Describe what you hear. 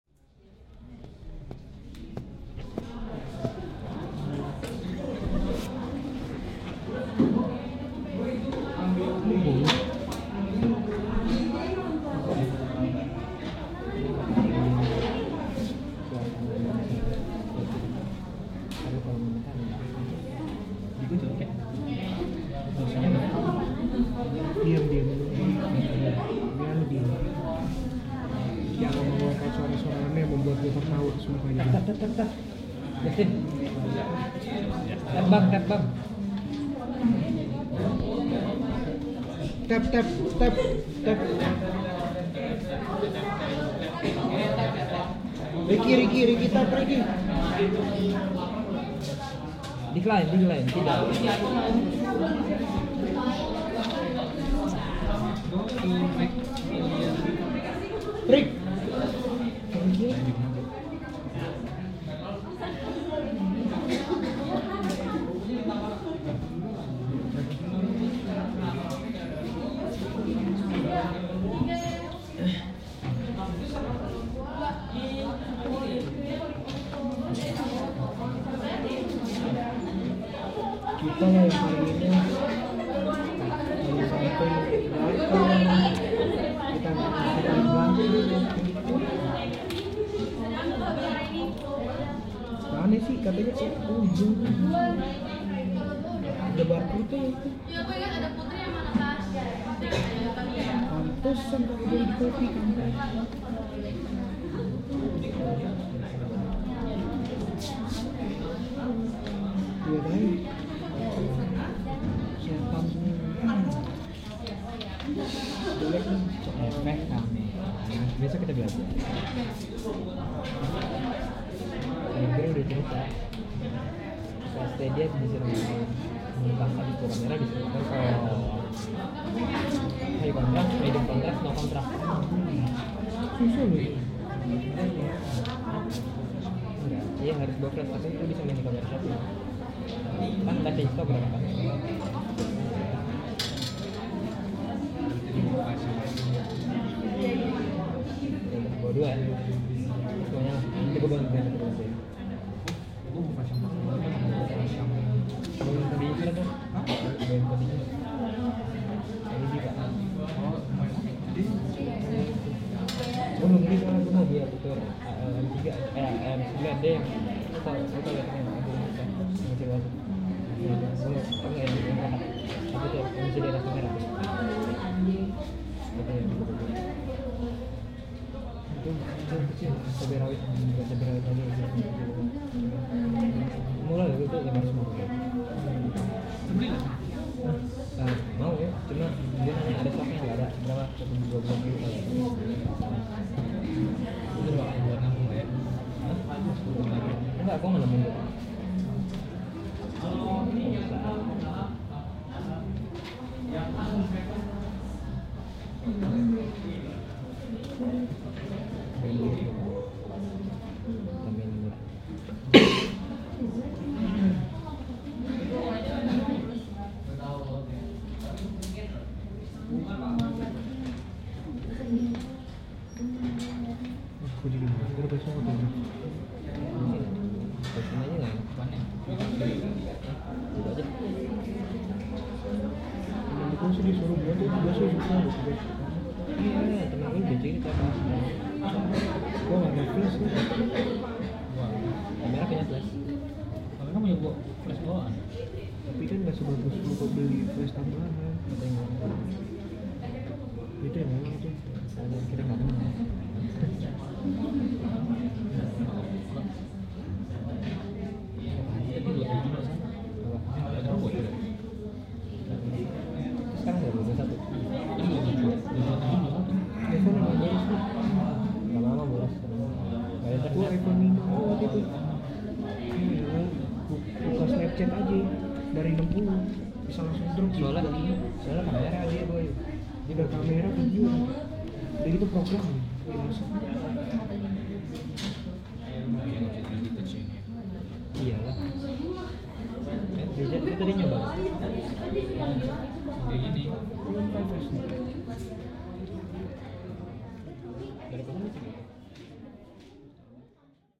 Classroom Ambience (before class)
Ambient from a classroom where people are still not in their seat, it was before class at 3 PM. Recorded with Rode Videomic Pro.
ambiance, ambience, ambient, atmosphere, background, class, classroom, college, field-recording, general-noise, murmuring, school, soundscape, students, white-noise